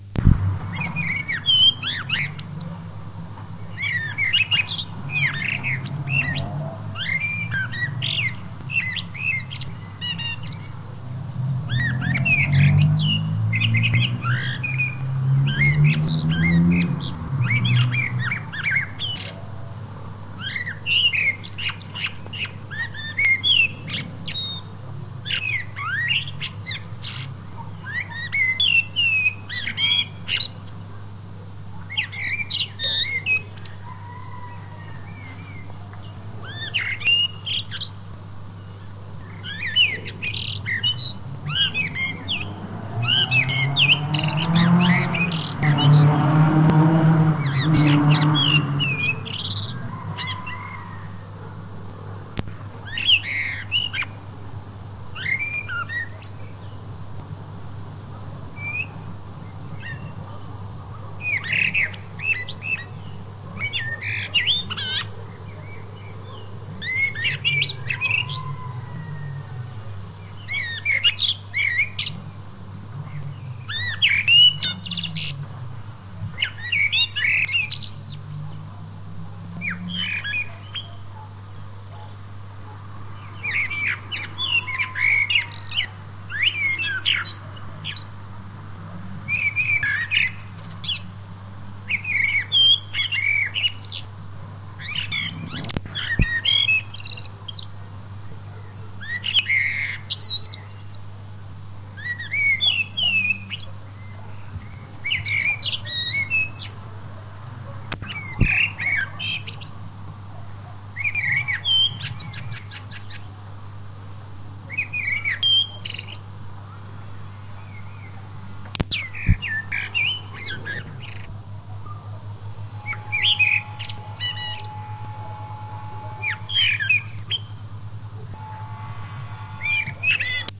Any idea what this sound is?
This is a blackbird recording on one evening in the spring of 2003 in Pécel, Hungary. I recorded it with a GRUNDIG CASSETTE RECORDER and a PHILIPS Microphone.